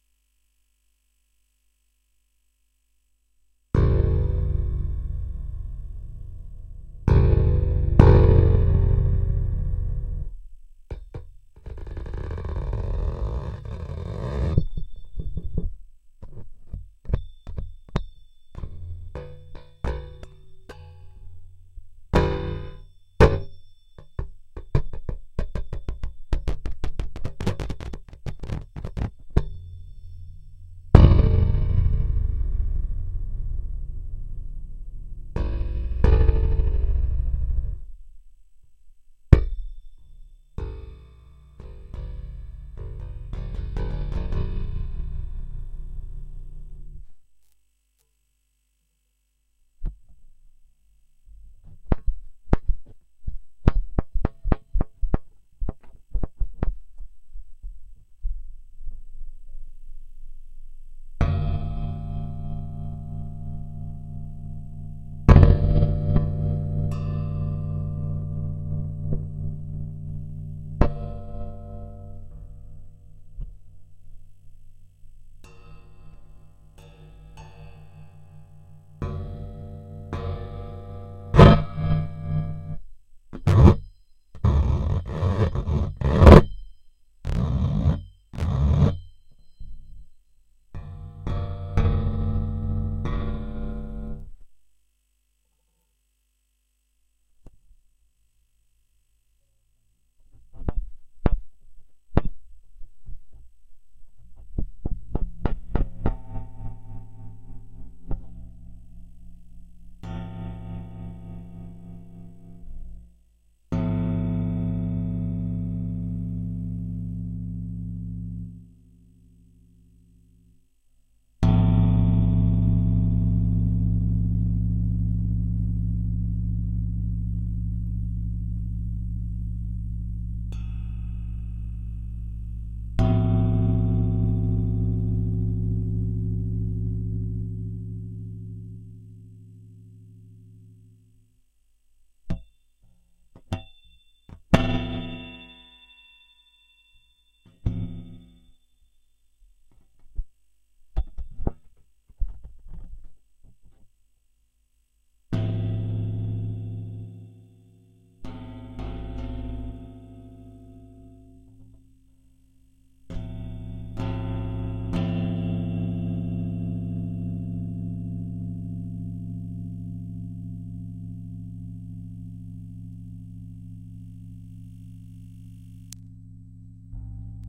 2017 03 20 INDUSTRIAL SPRING IR SESSION IMPROVED
Third recording of industrial springs. This time I build a pretty decent pickup for them and recorded through a better preamp
ir, reverb, shot, spring, ring, steel, iron, metal, industrial, impulse, response, convolution, fx, bell